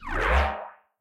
Teleport Morphy
Teleport with a morphy feel into warp zones. All of these were created from scratch and layered using various tools inside Pro Tools as well as old keyboards - also Ableton and Surge